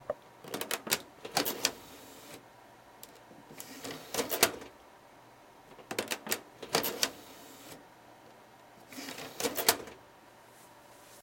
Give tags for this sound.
CD CZ Czech Office Panska

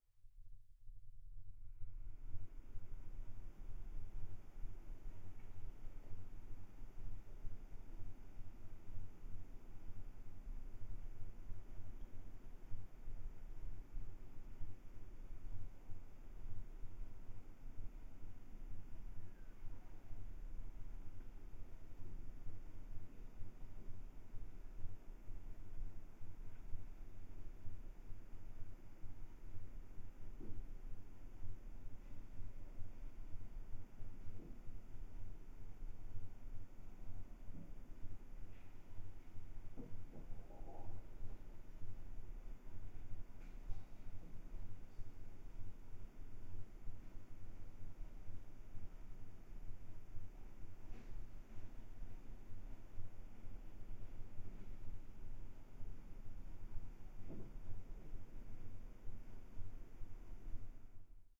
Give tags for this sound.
machine steam-punk

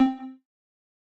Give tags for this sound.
user-interface beep click game videogam

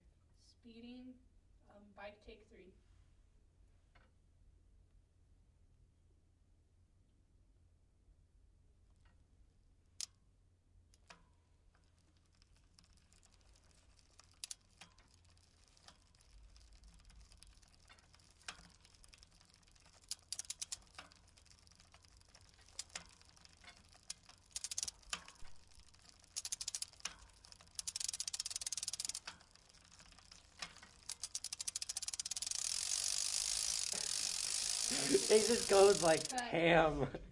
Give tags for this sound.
Bike Film Foley

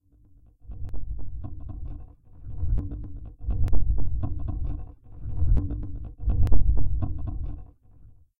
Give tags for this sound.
rhythm persussion found-sound loop

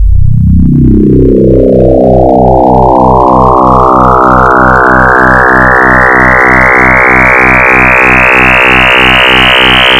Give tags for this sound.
formula mathematic

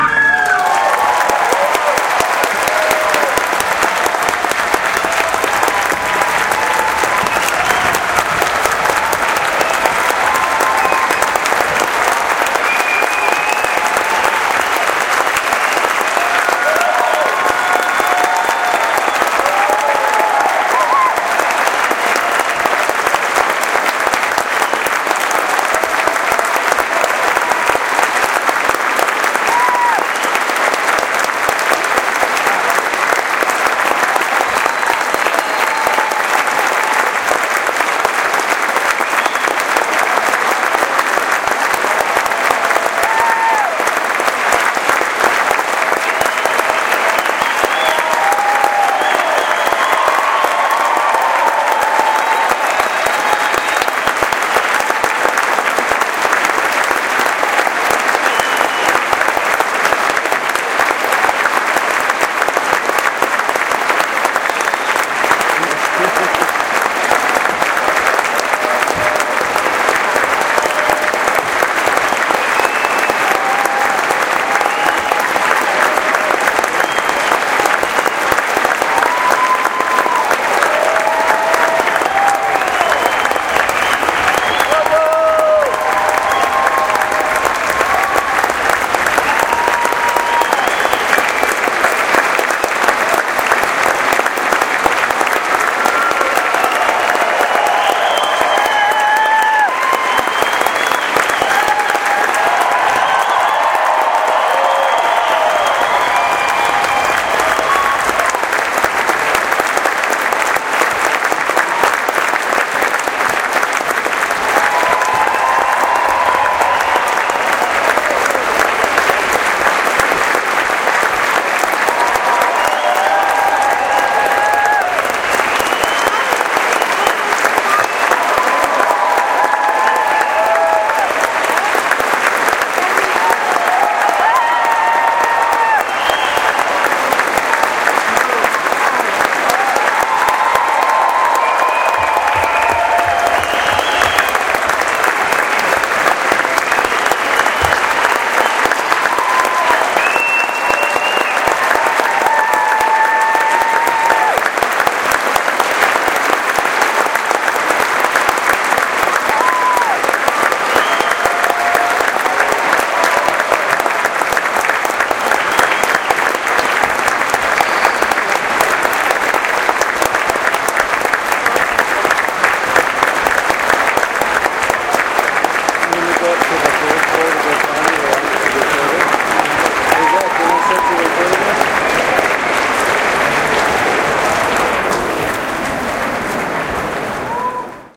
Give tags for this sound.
applauding applause appreciation audience auditorium cheer cheering clap clapping concert-hall crowd group polite whoop whooping wonderful

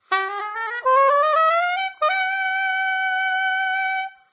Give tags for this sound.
sax soprano-sax soprano loop soprano-saxophone

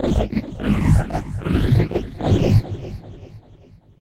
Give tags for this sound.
loop multisample vocal vocoded

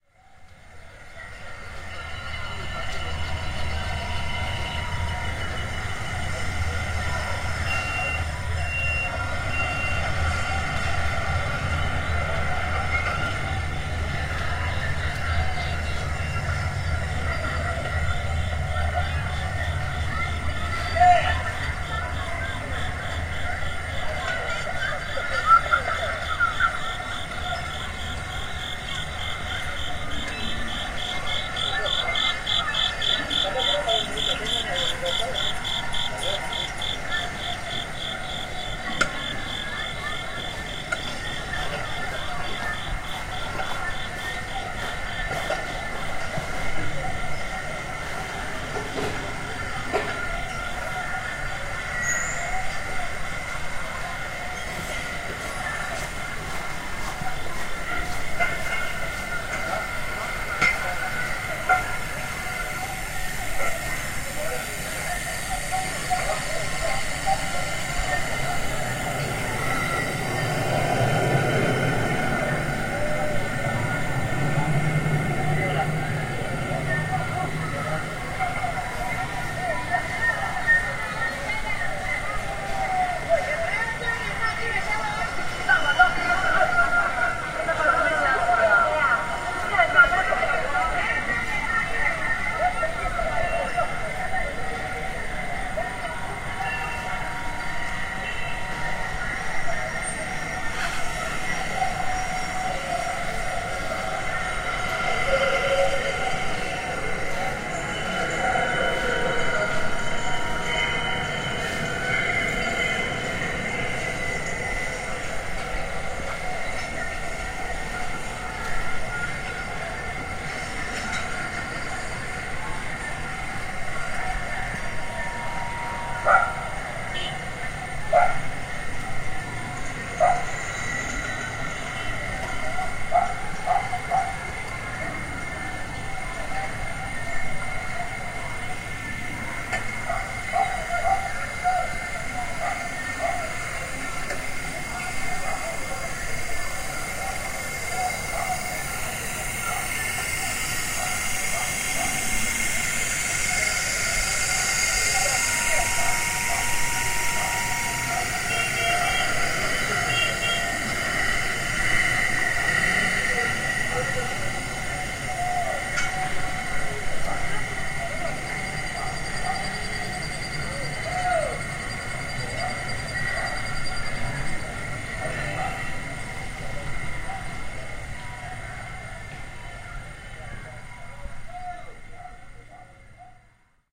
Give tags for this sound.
ambient sound Shanghai atmosphere lilong local China street Chinese Asian soundscape Asia hutong sounds longtang neighborhood alleyway ambiance field-recording lane

